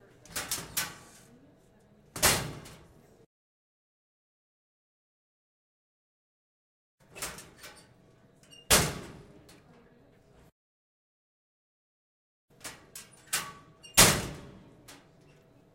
Recorded inside Alexander Building on Eastern Michigan University campus.
shut, school, door, close, open, gym